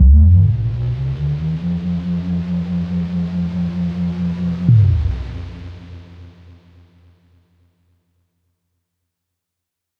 A bassy charging up sounds, for example for a futuristic weapon or generator.